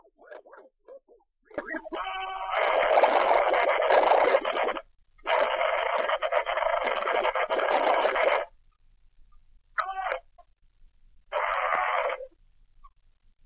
holding multiple buttons on a stereo's tape player.
cassette, distorted, lo-fi, noise, scratch, tape